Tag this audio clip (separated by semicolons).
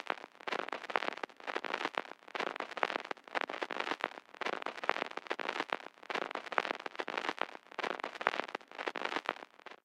record; surface-noise; crackle